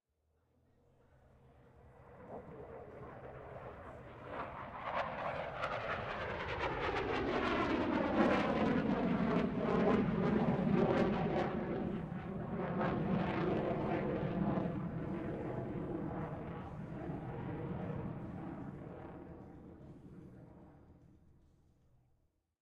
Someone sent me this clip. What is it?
A recording of a medium-proximity flyby of a Eurofighter Typhoon – a modern jet engine fighter airplane – at an airshow in Berlin, Germany. Recorded at ILA 2022.